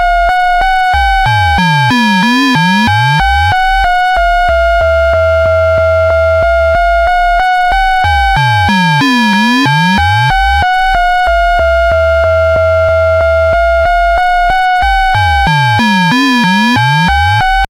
Experimental QM synthesis resulting sound.